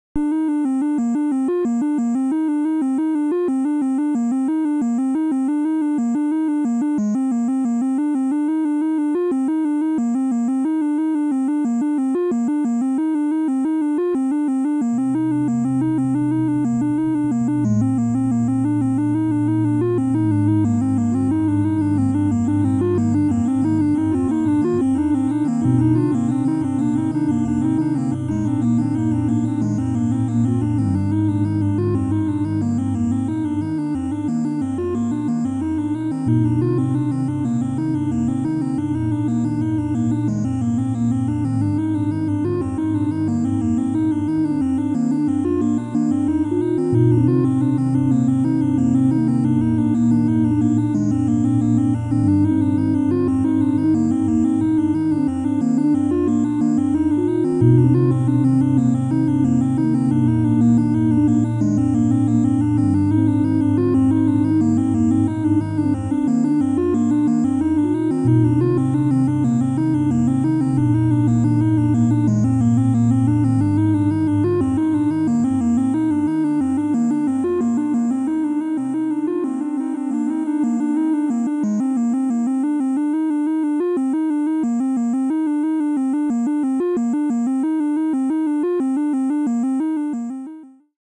8bit mix
A short mix of original 8-bit and my voice for bass, this could be used in another mix or to be used as Inspirational music or credits.